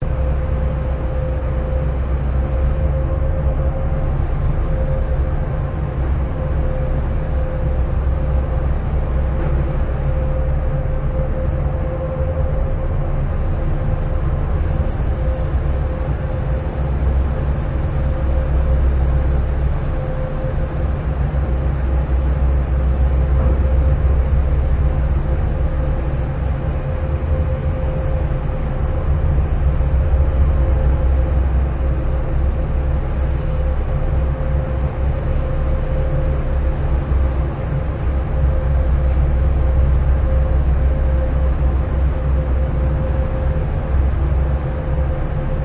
FX Low Baustelle
A recording of a street lot in cologne out of my studio window (NEUMANN U-87)
with a lot filtering.....
baustelle, cologne